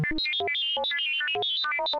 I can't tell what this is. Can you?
SCIAlrm 8 bit robot 2
8-bit similar sounds generated on Pro Tools from a sawtooth wave signal modulated with some plug-ins
alarm, alert, synth, 8bit, beep, computer, robot, scifi, spaceship